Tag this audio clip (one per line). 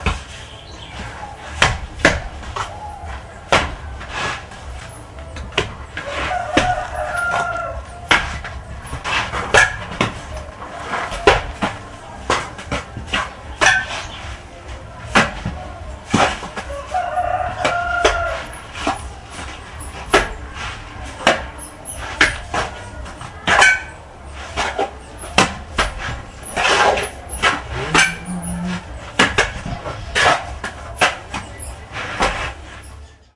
brasil
cement
concrete
wall
parede
bahia
cachoeira
brazil
cimento